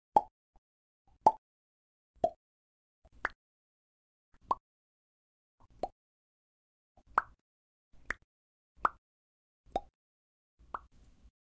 BUBBLES POPPING
Raw sound
I made some popping noises recorded them with Stereo Matched Oktava MC-012 Cardioid Capsule XY Array.
bubble, cartoon, environmental-sounds-research, field-recording, human-sound, sound-effect